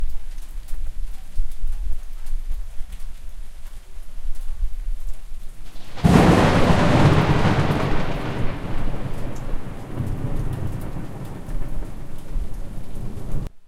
96Khz24 bit rain and thunder sound recorded withZoom H4N
It was around 1.30am ...the rain started with thunder rumbling. I woke up and the Zoom H4N was ready and I started recording